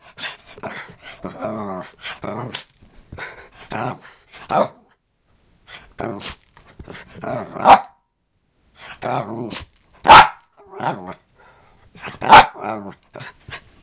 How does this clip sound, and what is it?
While I was trying to record other sounds my Yorkshire Terrier came in and got right in front of the microphone. I enticed her to bark. Funny thing is that she often will sneeze when I give her the bark command. This file is a bit of both.